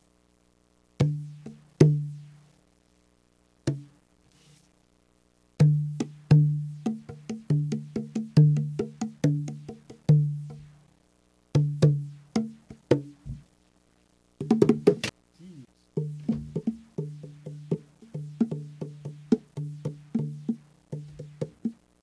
This is just me banging on my bongos. It's really just sampler food.